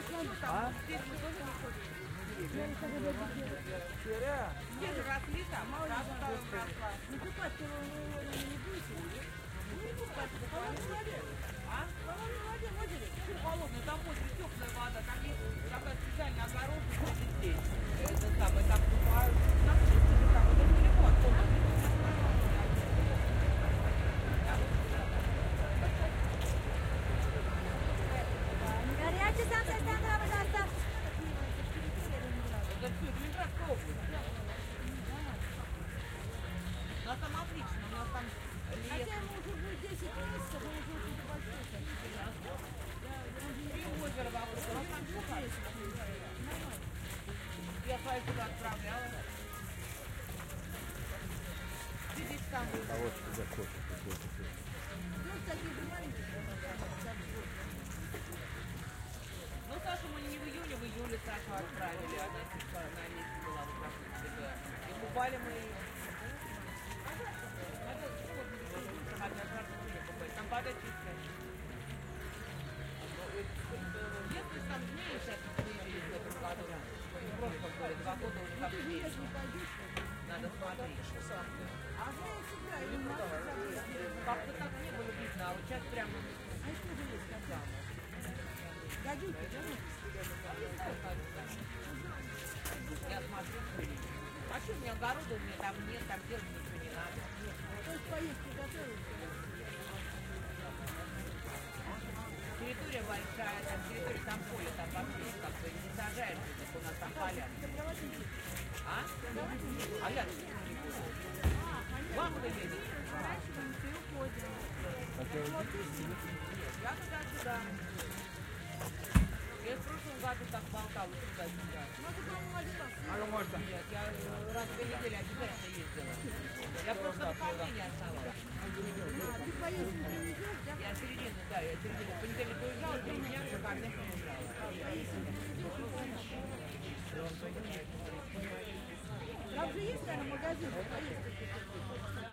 udelnaya markt
At the Fleamarket close to Udelnaya Station in St.Petersburg, Russia.
flea-market, market, raining, russia